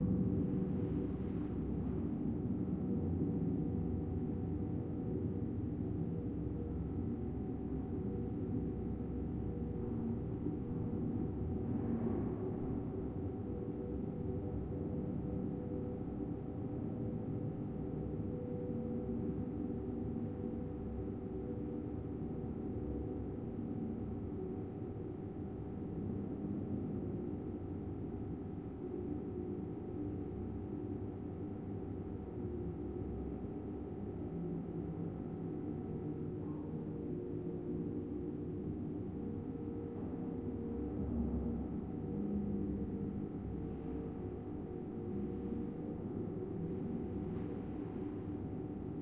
A heavily reverberated ambience recorded late in the night in subway.